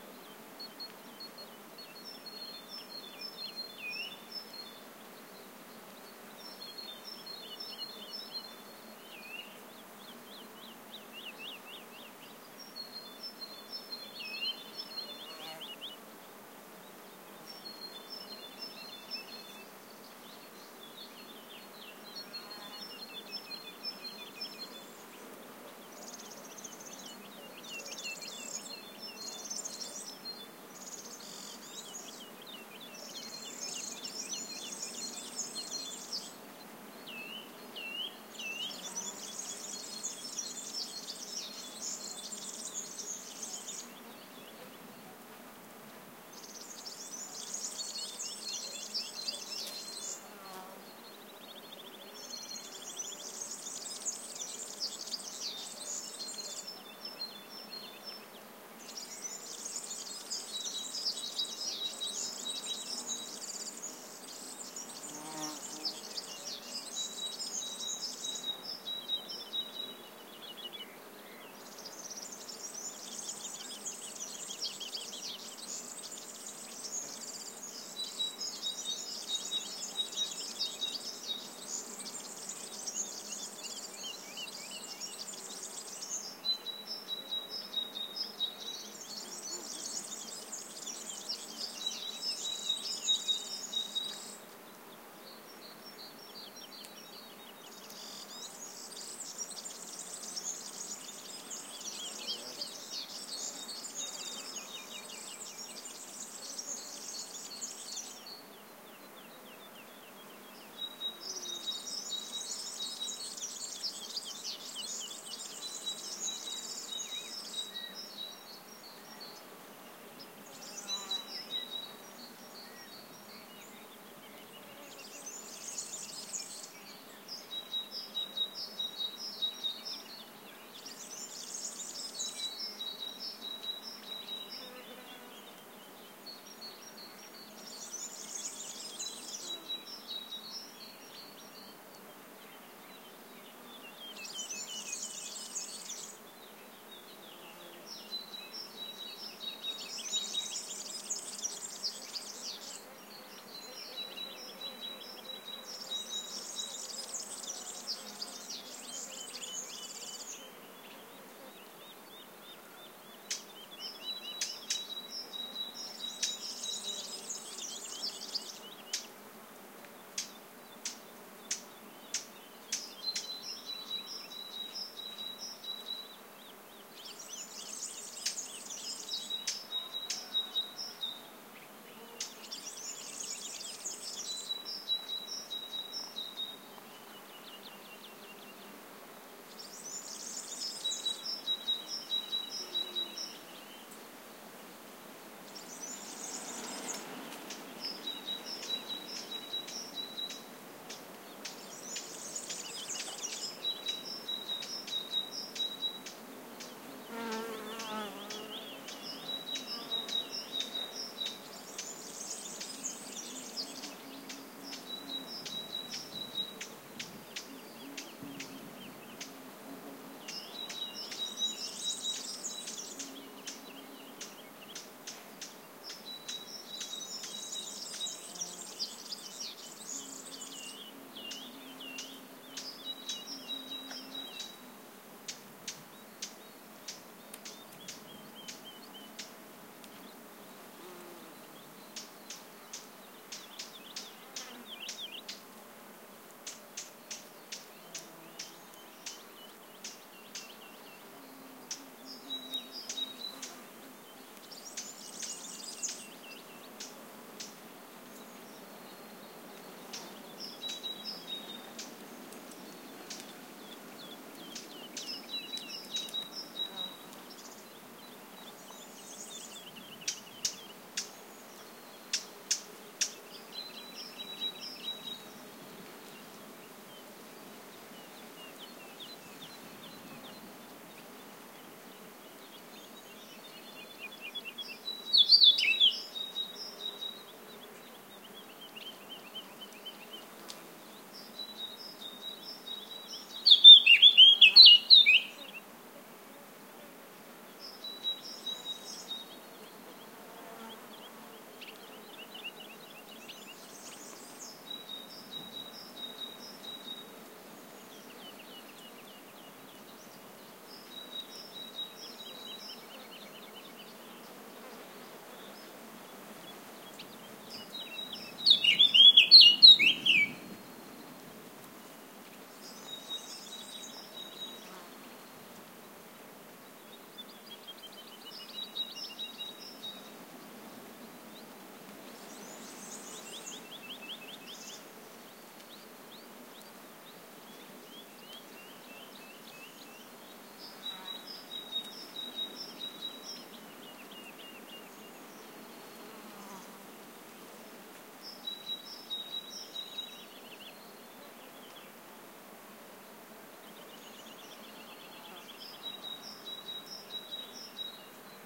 Peaceful afternoon ambiance with lots of birds singing. Primo EM172 capsules inside widscreens, FEL Microphone Amplifier BMA2, PCM-M10 recorder.
country, field-recording, Parus-major, scrub, Serin, Spain, spring, Warbler